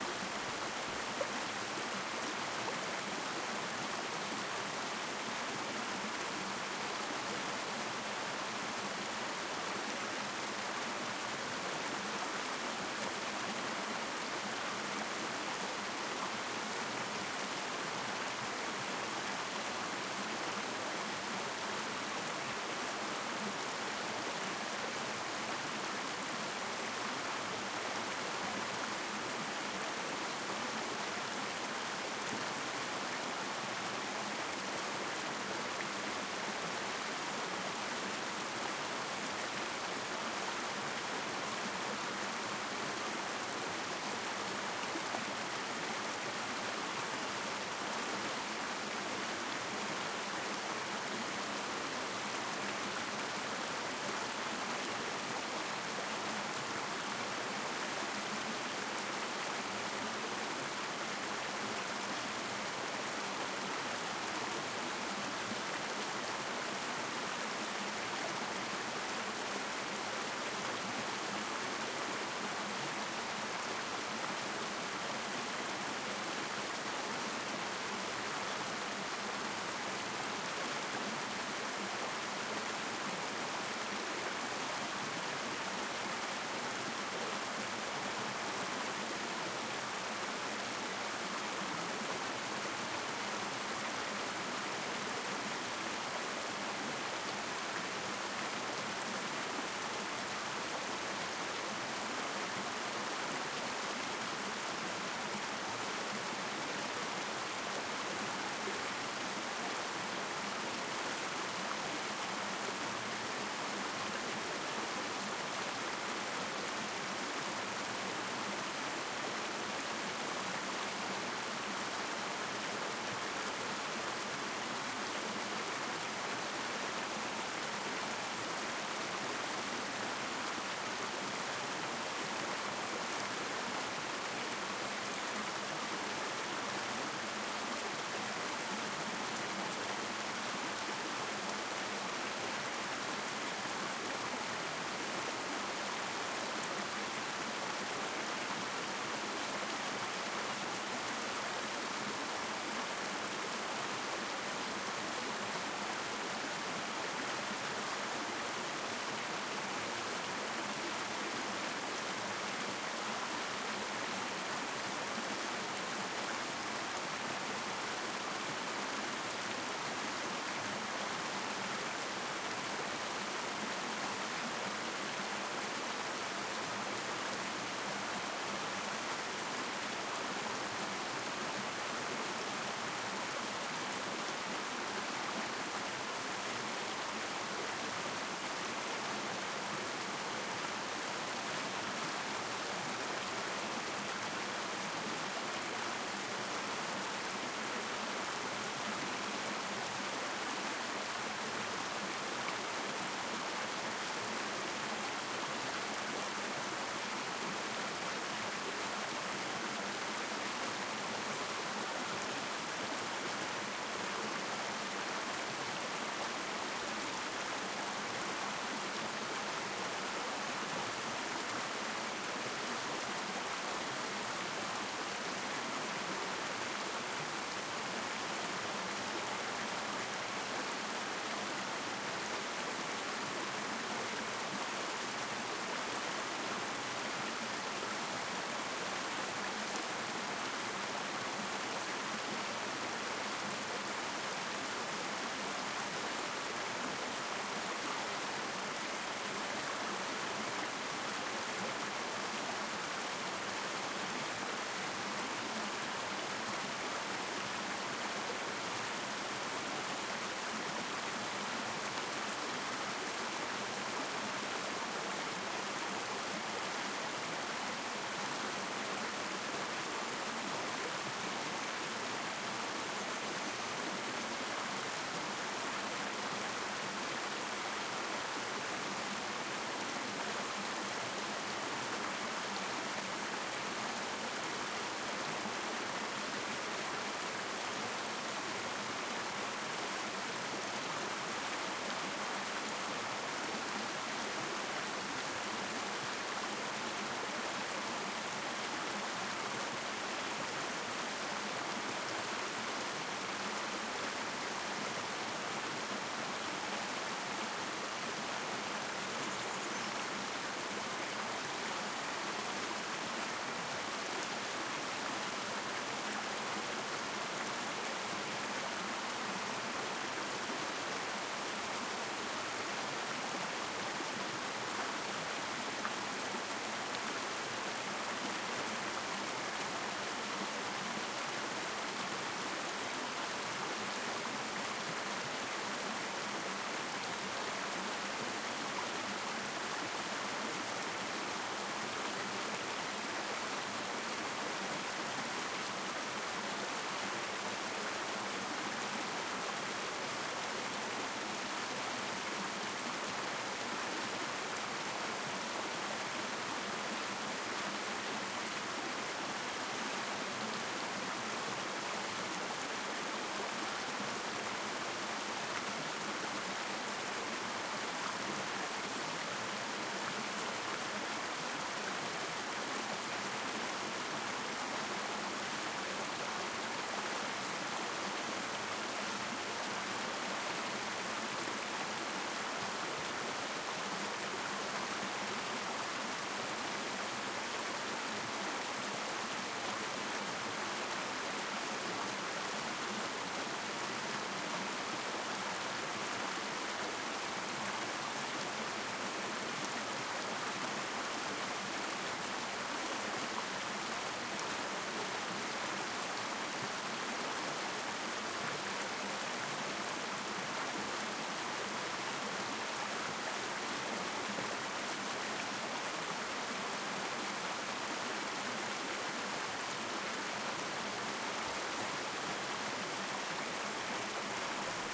large mountain stream